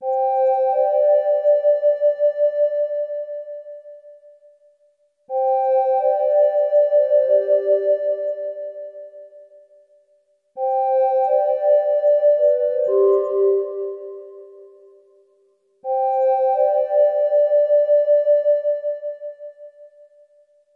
eerie-pad
Pad sound used in 'When shall we 3 meet again?'
eerie, ghost, pad